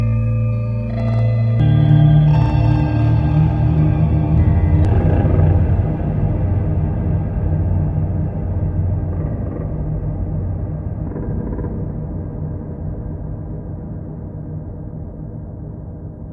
Melancholy Guitar
Stretched guitar sample to make a somewhat loopable sample.
guitar, interlude